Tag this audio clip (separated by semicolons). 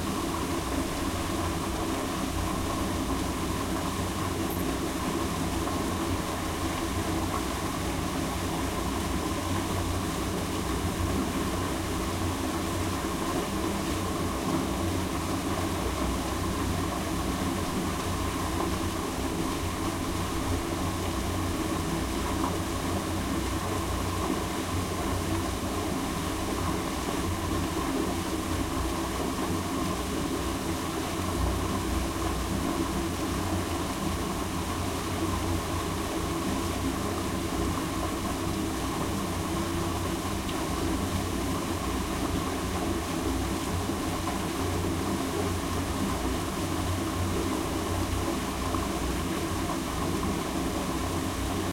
intake; sewer; surge; water; well